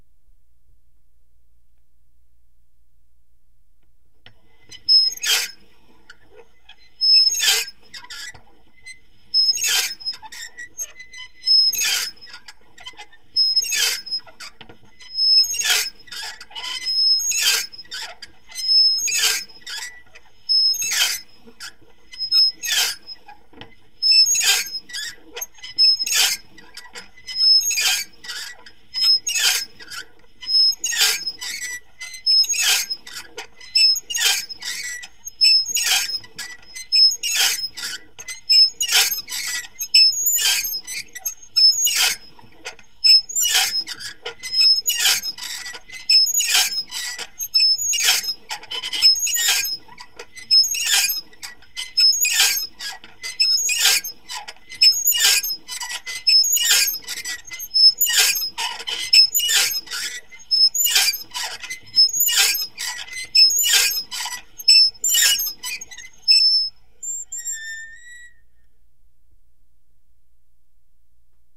Meat Grinder06M
I recorded these sounds made with a toy meat grinder to simulate a windmill sound in an experimental film I worked on called Thin Ice.Here is some medium to fast squeaking.